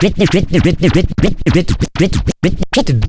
Scratching a vocal phrase. Sounds like "it-i-ity-it-i-it-ity". Technics SL1210 MkII. Recorded with M-Audio MicroTrack2496.
you can support me by sending me some money: